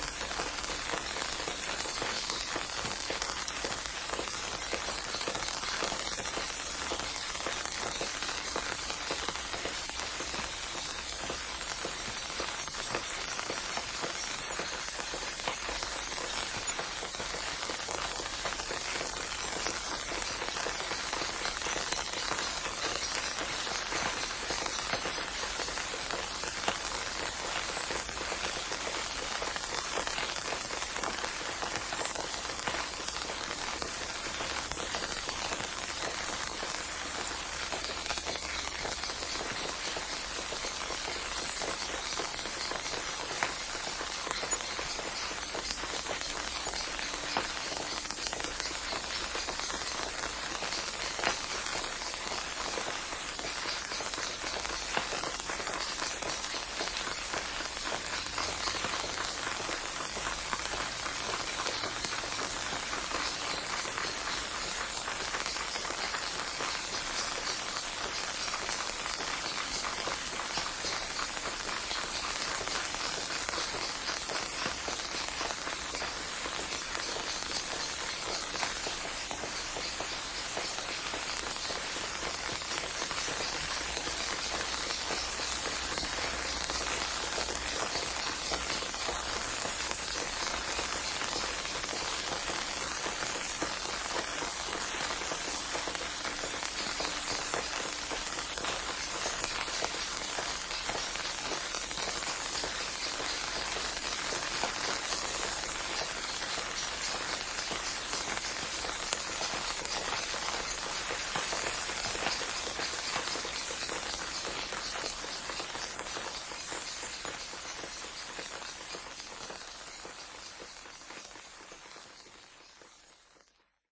rain rainfall raining weather shower
rain, rainfall, raining, shower, weather